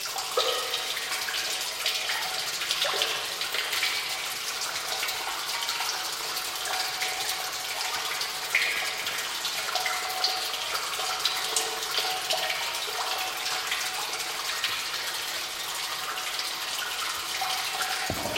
Small cave river flow
Small stream of water in cave, strong reverb.
cave, creek, flow, gurgle, liquid, river, stream, water